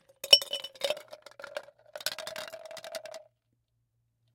Ice Into Martini Shaker FF290
Slowly dropping ice into martini shaker, ice hitting metal, ice cubes hitting one by one
dropping, ice, martini, shake, Slowly